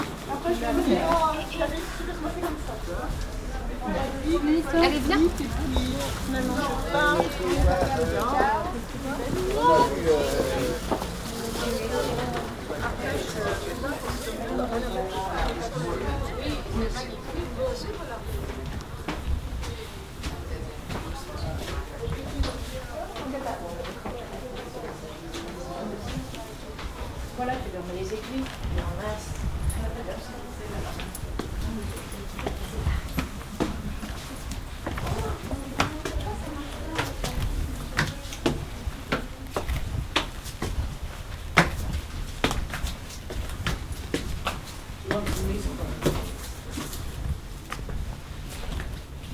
bormes street sounds
General street sounds from Bormes les Mimosas France - voices and footsteps. Minidisc recording.
voice, footsteps